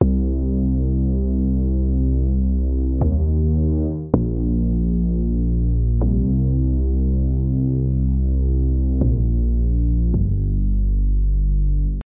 Cloud Bass Electronic (2)
an electronic backing bass loop I wrote. C-minor, 80-bpm. Pairs well with a more complicated sounding bass that I uploaded alongside this.
loop, bass, 80-bpm, deep, cloud, simple, beat, Cm, dance, funk, techno, funky, low, electro, electronic, Minor